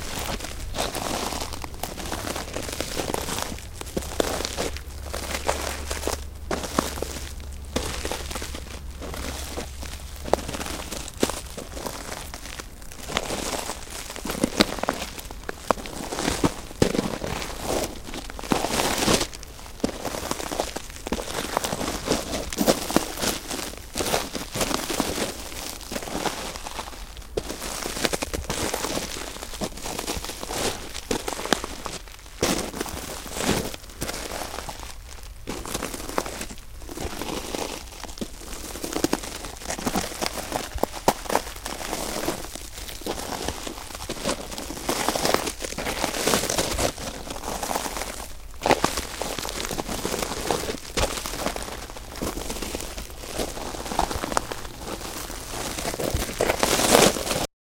15 Footsteps on rock; near; present; raspy; open space
Footsteps on rock; near; present; raspy; open space
Footsteps, near, open, present, raspy, rock, space